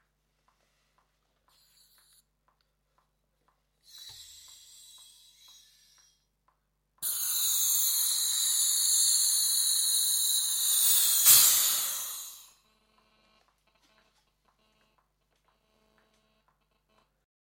Laughing gas/nitrous oxide/nos balloon inflation audio sample #07
Inflation of nos balloon recorded on wide diaphragm condenser, with acoustic dampening around the mic but not in studio conditions - should be pretty cool for a non synthy noise sweep, or for a snare layer